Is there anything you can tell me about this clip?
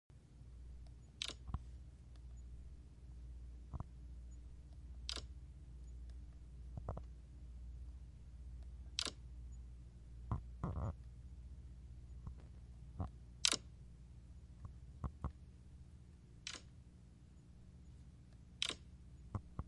iPhone Lock
A iPhone's locking noise